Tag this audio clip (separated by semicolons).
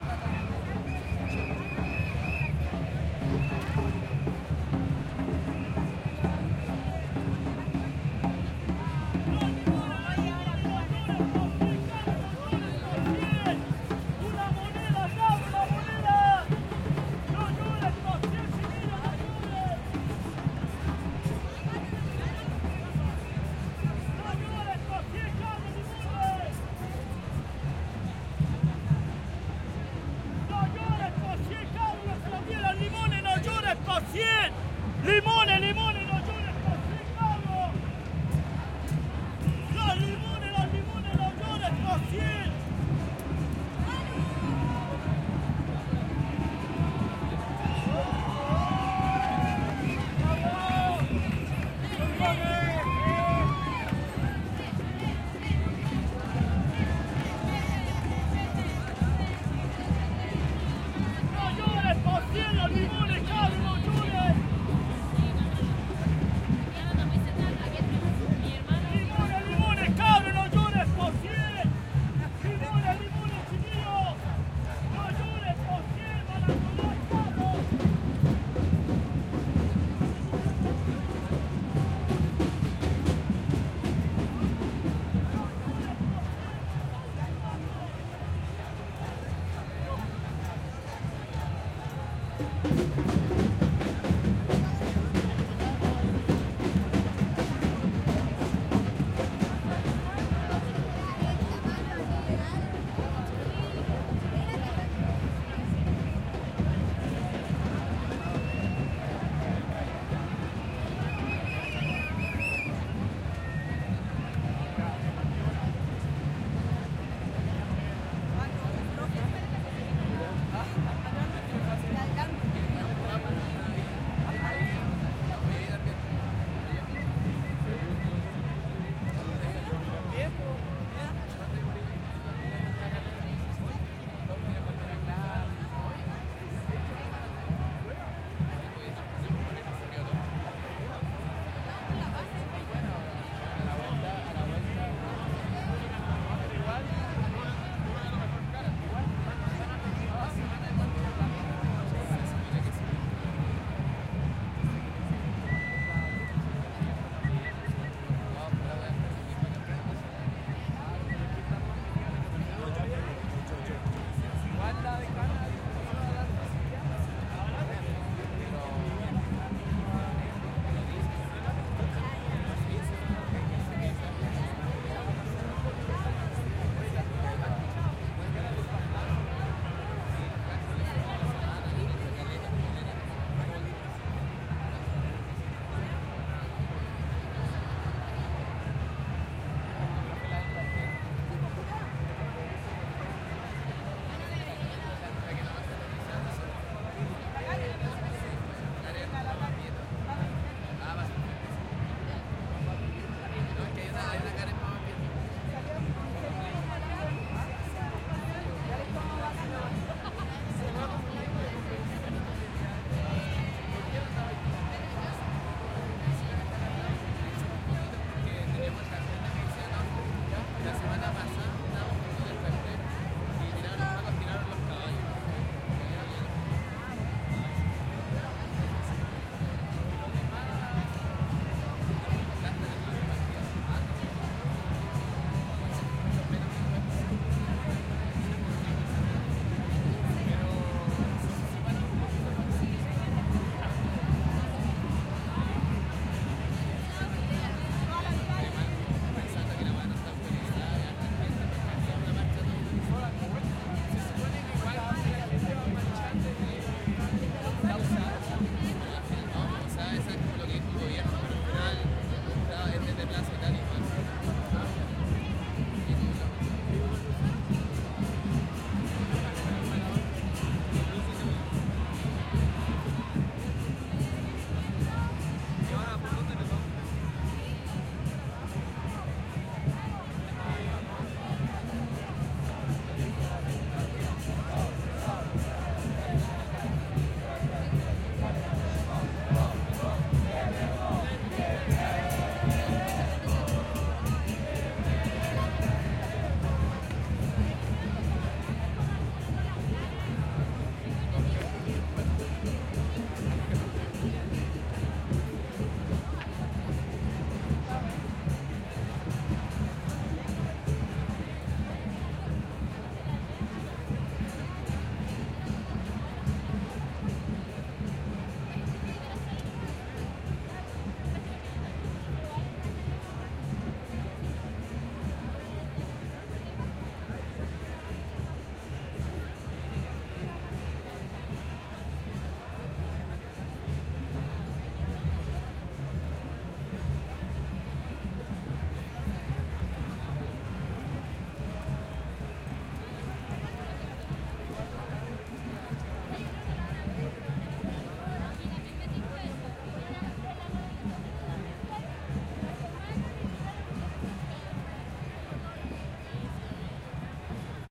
ambiental; batucada; calle; chile; comerciante; crowd; educacion; exterior; gente; marcha; nacional; paro; people; protest; protesta; santiago; street; strike; voces